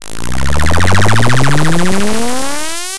Old school teleport sound made in Super Collider.
teleport, super, collider